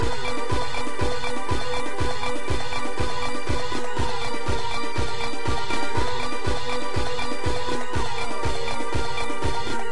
a really weird techno thing